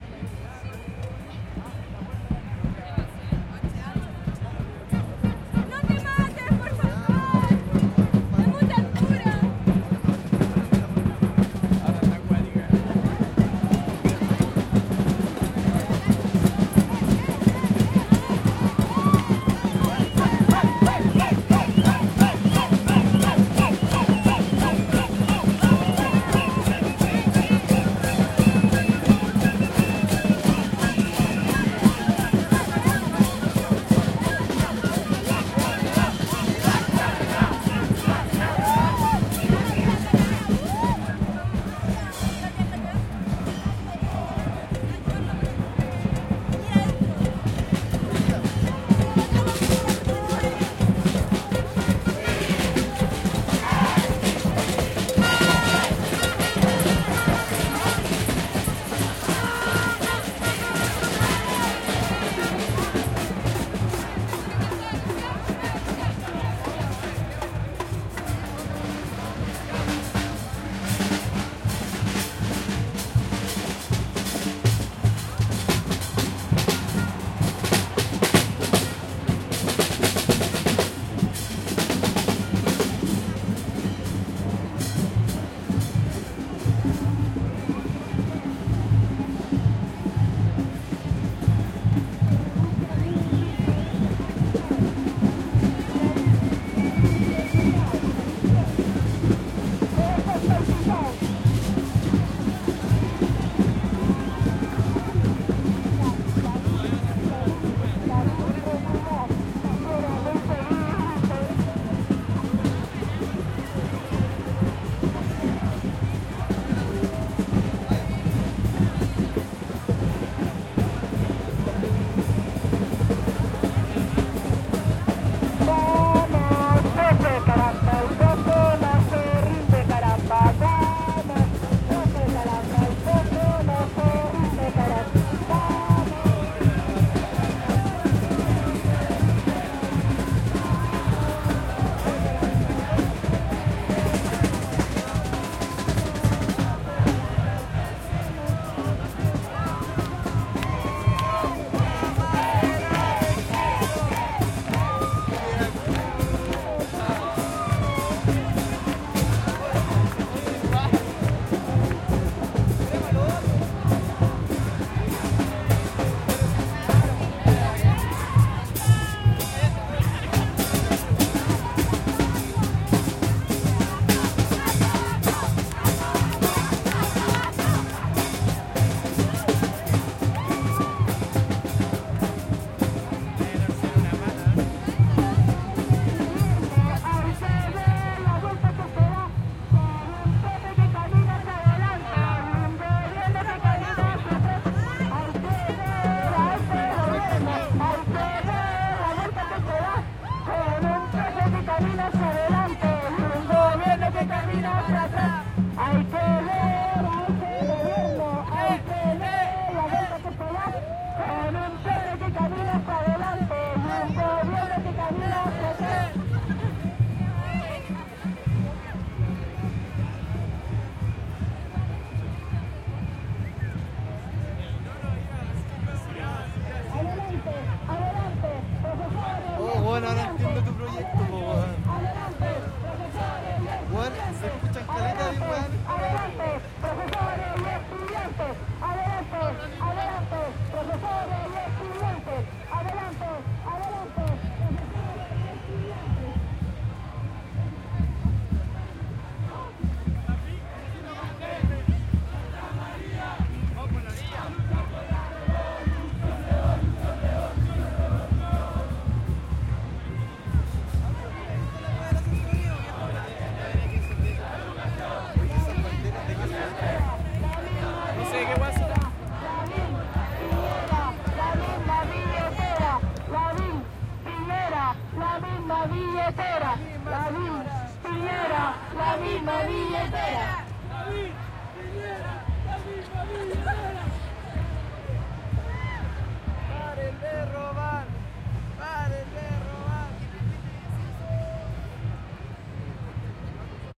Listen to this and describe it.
marcha estudiantes 30 junio 06 - elementos de la batucada
Desde baquedano hasta la moneda, marcha todo tipo de gente entre batucadas, conversaciones, gritos y cantos, en contra del gobierno y a favor de hermandades varias.
Diversos grupos presentan algún tipo de expresión en la calle, como bailes y coreografías musicales en las que se intercruzan muchos participantes.
Matices de una batucada móvil entre tambores, cajas, cencerros, panderos y platillos. Cantos en megáfono interpretados por los profesores y estudiantes.
batucadas, carabineros, chile, conversaciones, cops, crowd, de, drums, estudiantes, march, marcha, murmullo, protest, protesta, santiago, sniff, tambores